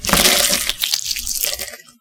egg crack sounds mixed
this is all of my 27 samples of cracking and peeling boiled eggs mixed into one! doesn't it sound just so wonderfully disgusting? XD